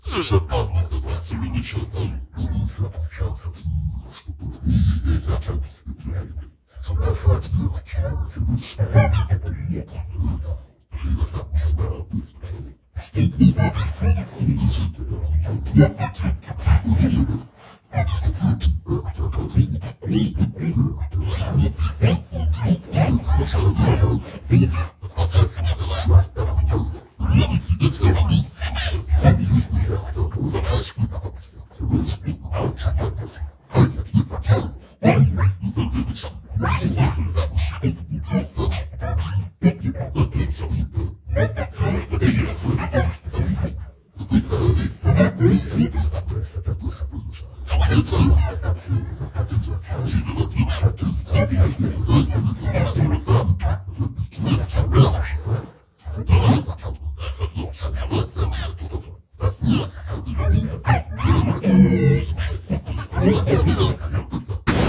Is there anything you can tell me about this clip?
demonic french voice
slow, horror, science, sci-fi, demon, evil, fi, creepy, low, scif, gothic, fiction, scary, low-pitch, spooky, sci, eeri, haunted, corrupted, pitch, lo-fi, demonic